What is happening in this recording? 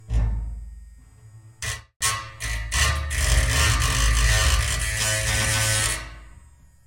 loud n torn - loud n torn-[1]
Electric shaver, metal bar, bass string and metal tank.
processing, metallic, motor, shaver, engine, metal, Repeating, electric, tank